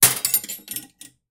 silver dropped in sink
clank, noisy, hit, impact, metallic, clang, metal, spoon, silverware, dropped, silver